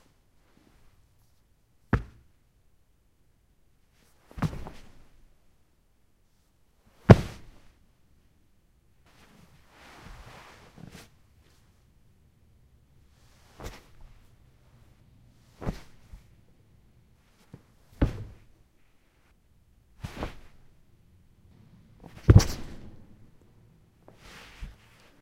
Stupid Falls
I put the recorder on the ground and dropped my body on the carpet, only the last one hurt. It was fun, you should try it too!
Made for an open source game sounds request.
This recording was made with a Zoom H2.